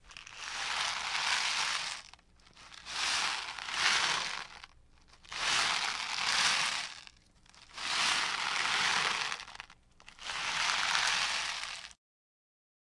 Deslizamiento de Nerds 3s
Dulces Nerds son agitados dentro de su mismo empaque.
agitando, materiales, cali, diseo, accin, agitar, Audio-Technica, dmi, estudio, dulces, medios, caja, nerds, interactivos, cayendo, deslizamiento